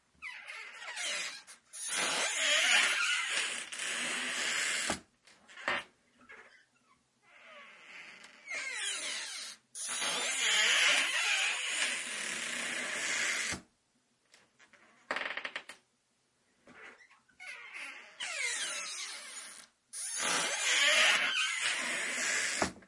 Creaking door
noise, old-door, scary, scary-games, scary-sounds